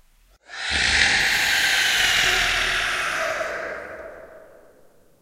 Nazgul Scream 1
All my sounds were created for a motioncomic I created called: Kay & Gojiah... I did not create these from scratch, but instead, remixed stock sounds of different roars, growls, breathing, etc. and fiddled with their settings until I got a sound I felt satisfied with. I thought the best thing to do with them after the project was done is to share.
Nazgul, unearthly, creature, Demon, evil, monster, Nazgul-scream